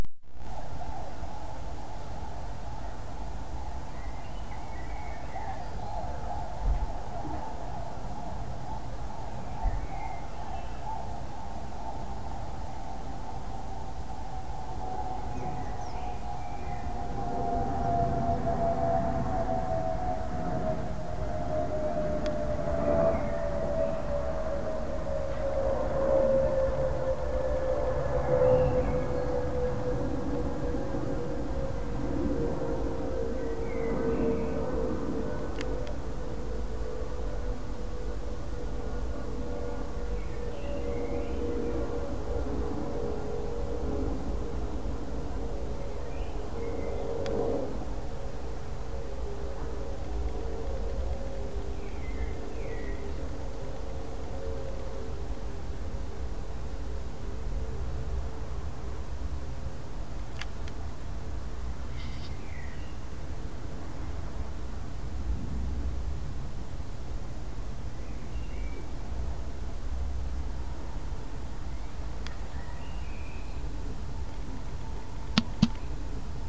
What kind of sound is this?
fairly noisy recording out the window in north london, including birdsong, a distant police siren and a jet flying overhead.